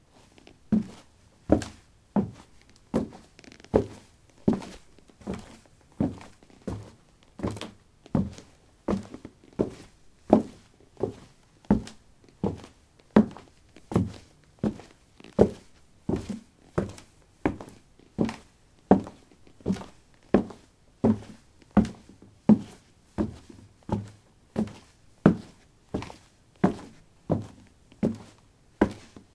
wood 15a darkshoes walk
Walking on a wooden floor: dark shoes. Recorded with a ZOOM H2 in a basement of a house: a large wooden table placed on a carpet over concrete. Normalized with Audacity.